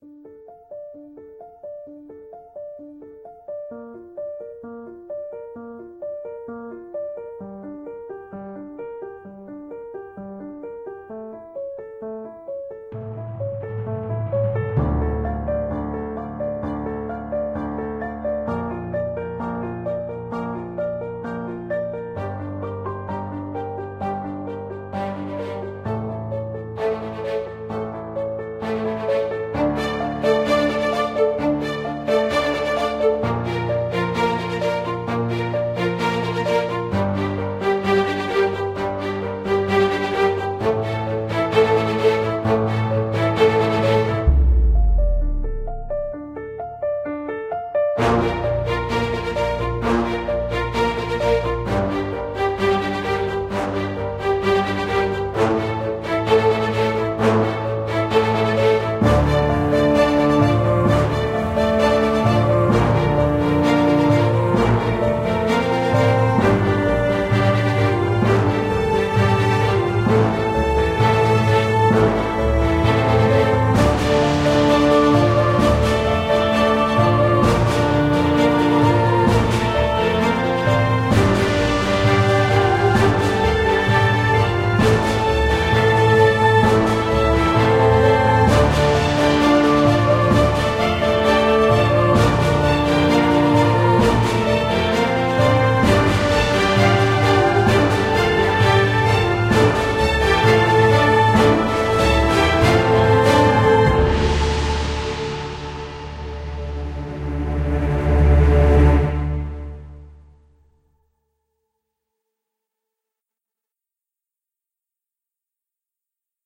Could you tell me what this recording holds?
Suspense Orchestral Soundtrack - Hurricane
free, classical-music, timpani, strings, emotional, cinematic, suspense, music, film, epic, atmosphere, ambient, drama, orchestra, score, outro, violin, orchestral, hans-zimmer, misterbates, movie, best, sypmhonic, background, soundtrack, dramatic